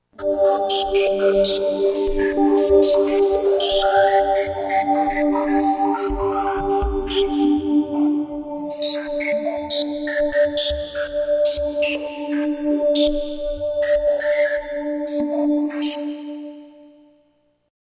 The Cosmos sound from my Roland GR-33 Guitar Synth
GuitarFilmRoland, Midi, GR-33